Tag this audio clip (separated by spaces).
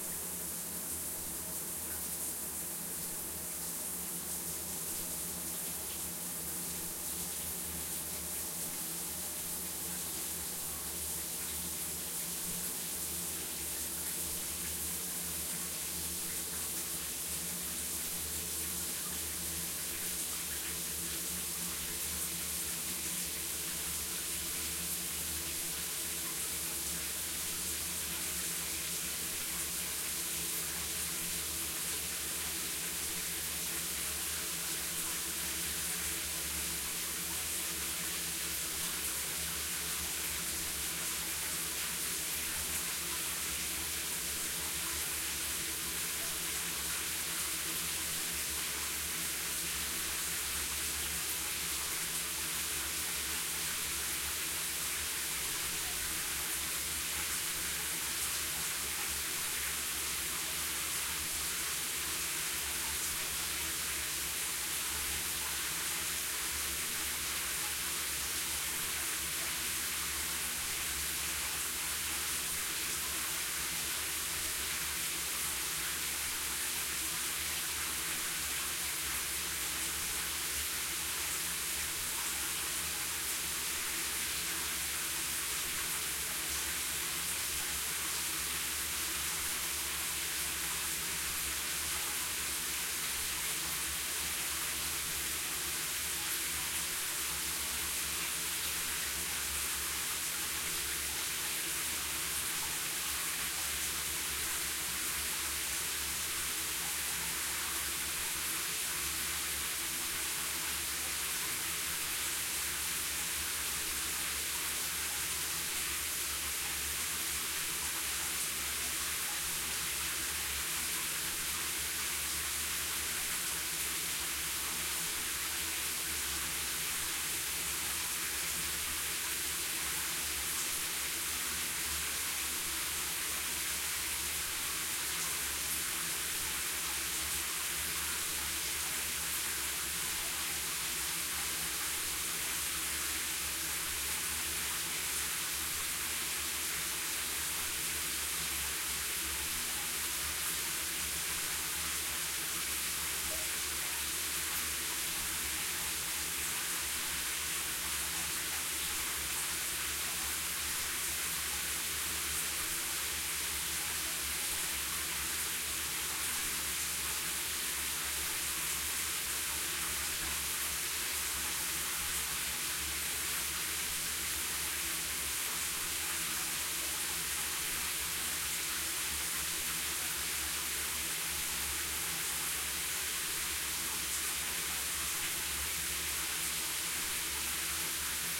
shower bathroom water bath bathtub drain drip